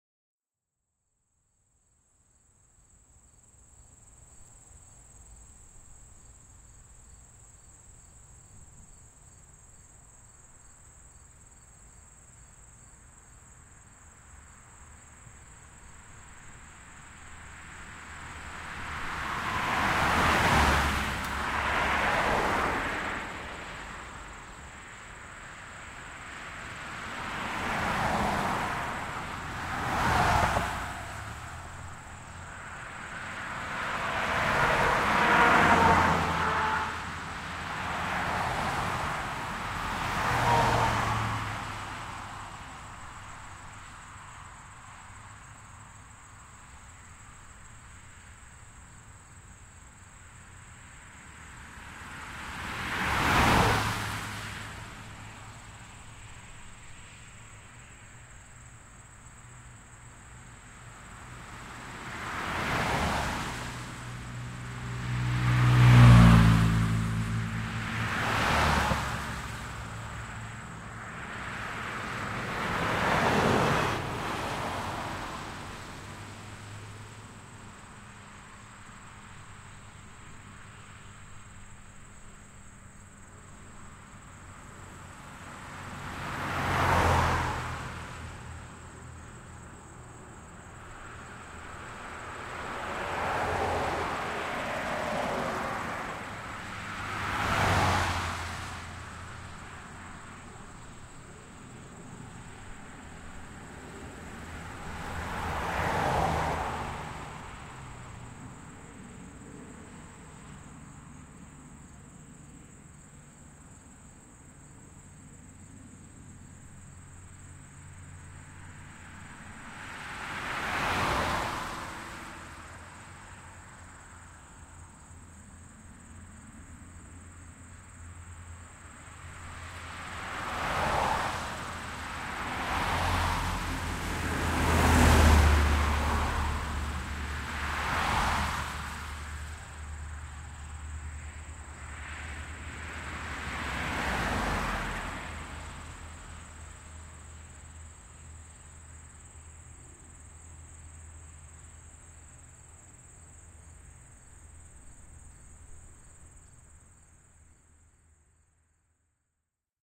sample pack.
The three samples in this series were recorded simultaneously (from
approximately the same position) with three different standard stereo
microphone arrangements: mid-side (mixed into L-R), X-Y cardioid, and
with a Jecklin disk.
The 2'40" recordings capture automobile traffic passing from right to left
and left to right directly in front of the microphone
on a country road in New Jersey (USA) on September 9, 2006. There
is considerable ambient sound audible, mostly from a proliferation of crickets.
This recording was made with a Rode NT4 X-Y stereo microphone (with
a Rycote "Windjammer") connected to a Marantz PMD-671 digital
x-y, xy, nt4, rode, traffic, cars, country-road, crickets, field-recording, road